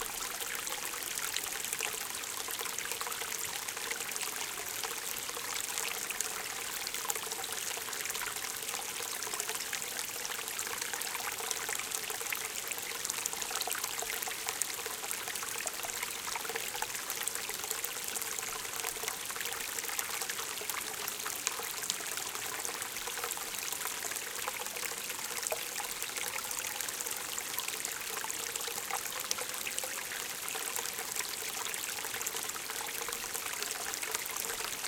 Trickling Water 3
Field-recording of water slowly trickling down a stream.
Recorded in Springbrook National Park, Queensland using the Zoom H6 Mid-side module.
brook, creek, dripping, field-recording, flow, flowing, gurgle, liquid, river, stream, trickle, trickling, water